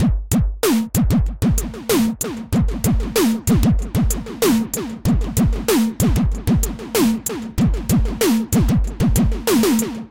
EXPERIMENTAL DRUMS 02
Drum loop created with EXD-80
120bpm, drumloop, EXD-80